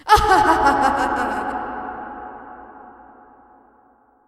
Evil laughter recorded for a production of Sideways Stories from Wayside School. Reverb added.